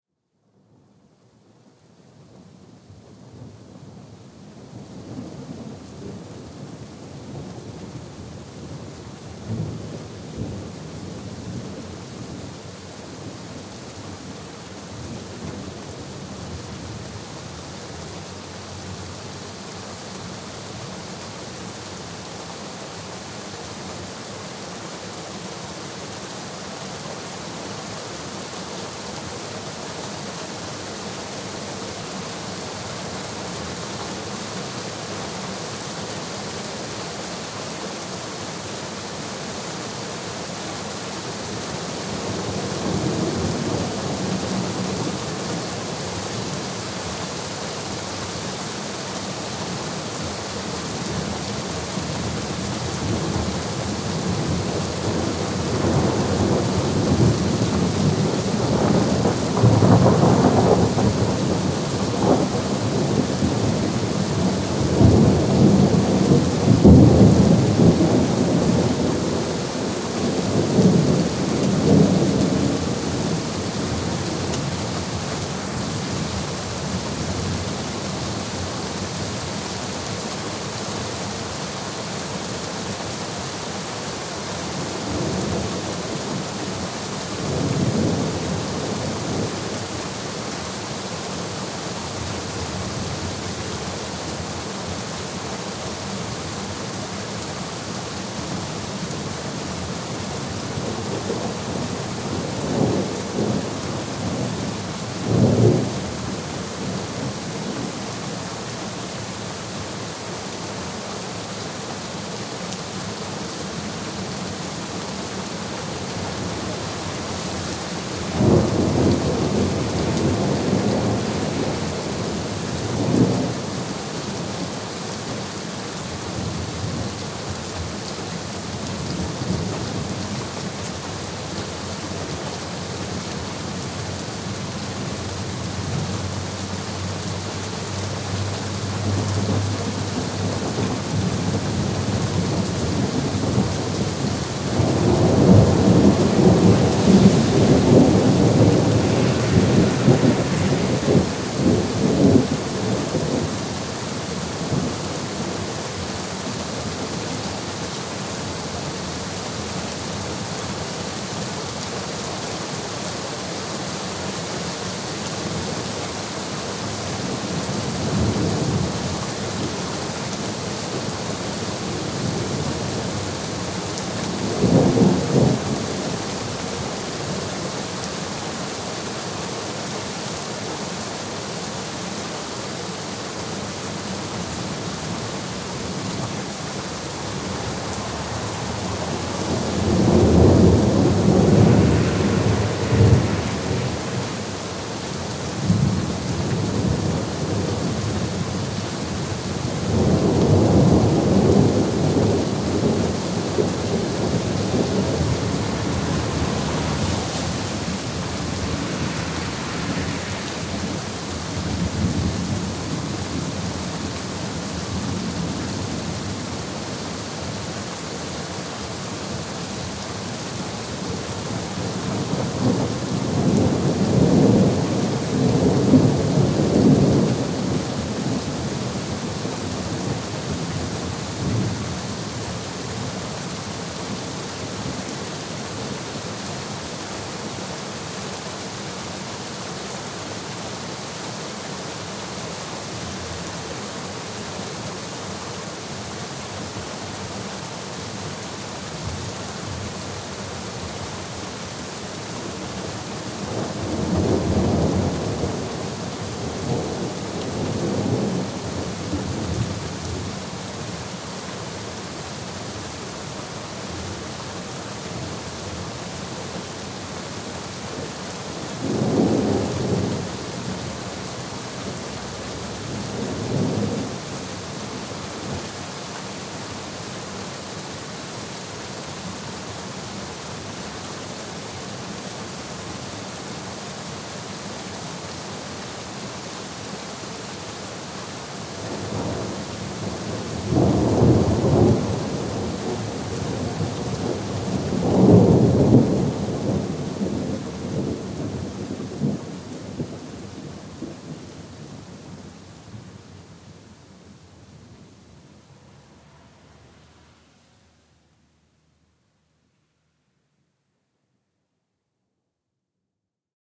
Some nice rain and thunder noises I just recorded.
The audio was recorded with a the t.bone "SC 600" with a cardiod polar pattern.
Enjoy!
Rain, thunder, noise, atmosphere, sample, ambience, ambient, free, car, field-recording